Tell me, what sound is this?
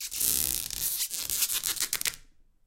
Squeaks made by running a finger across a stretched plastic grocery bag
squeak, bag, plastic